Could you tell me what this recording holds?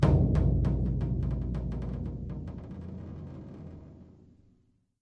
Dropping Drumstick On Skin
percussion, drum, drop, stick